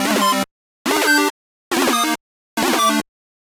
Arpeggiated Bleep Sequence
This short melodic sequence was made using NI Massive and is constructed of a simple arpeggio pattern at 140bpm.
bleeps, synthesis, game, arps, massive, oldschool, FX, sounddesign, 140bpm, retro, arpeggio, bleep, 140